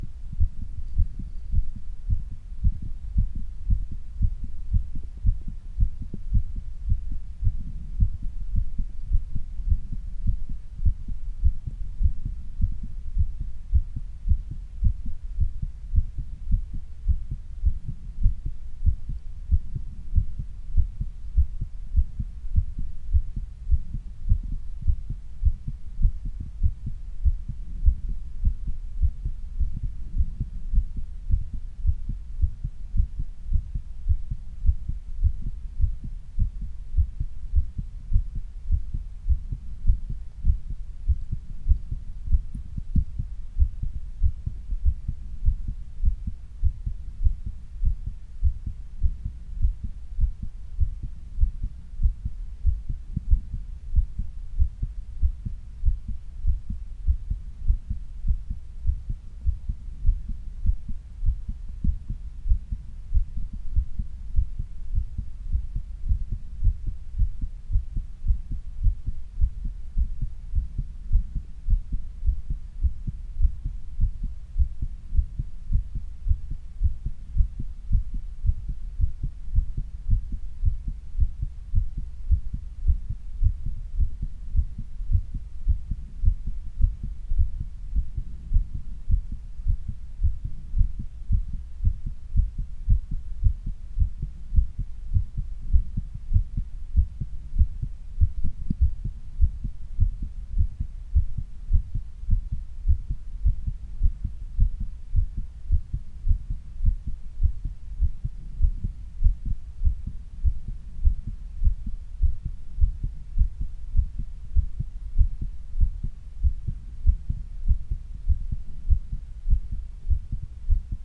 Real heartbeat sound faster
Audio recording of the heart of a 31 year old male, pumping at an elevated rate while standing. About 100 beats per minute.
Recorded with a GigaWare lapel mic and a small ceramic bowl. Recorded on November 24, 2018.